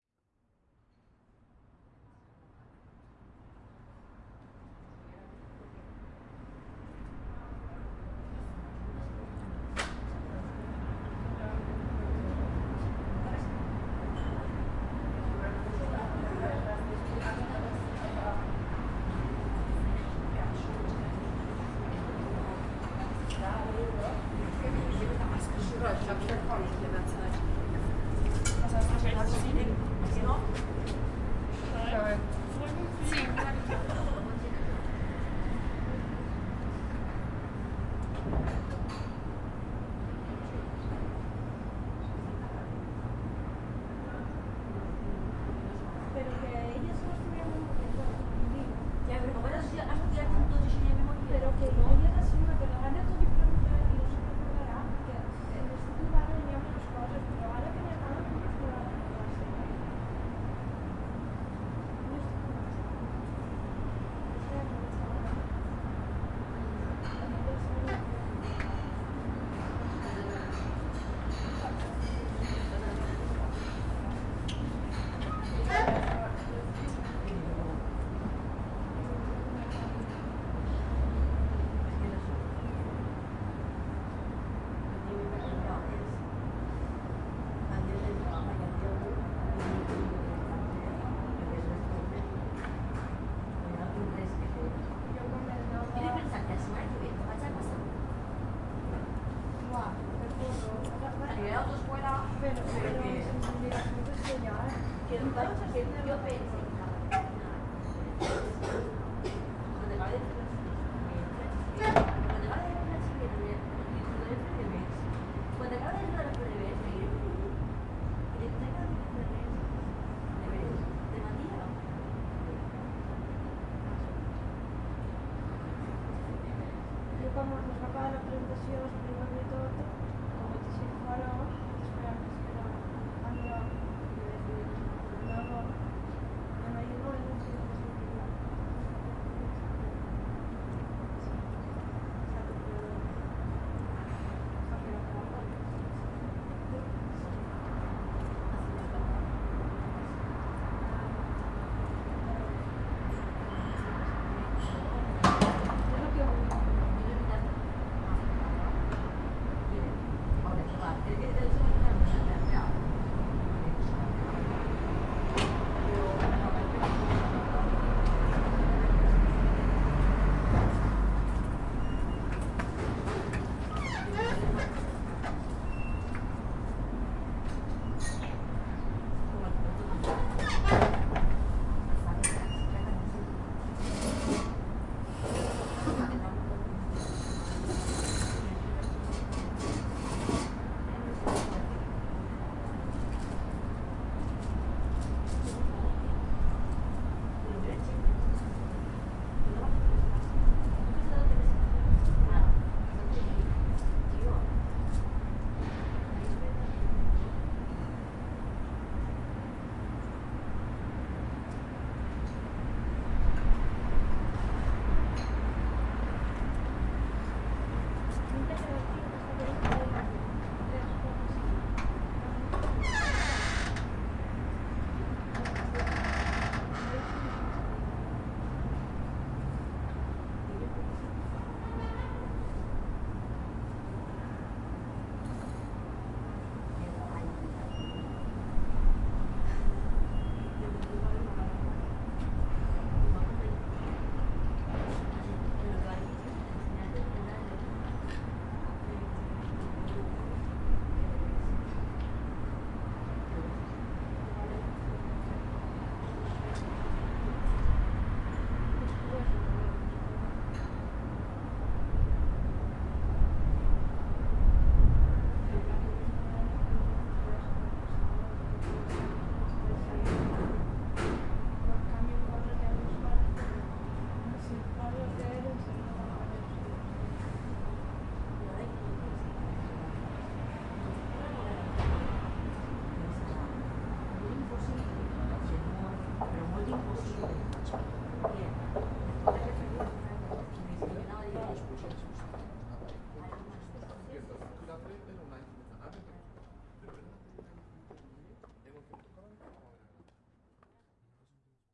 Ambient sound outside cafeteria
Soundscape recording from outside a cafe, from one of the tables of the terrace, between 13:00 and 14:00 h.